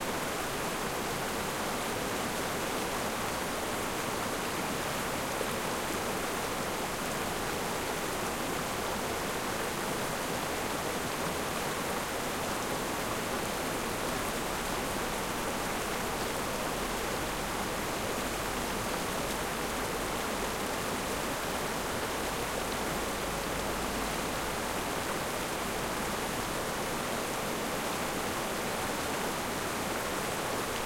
Waterfall in the alps
Taken during a hike in the Tyrolean Alps in 2018. Tascam DR-05
Software ADOBE Audition CS6
water, waterfall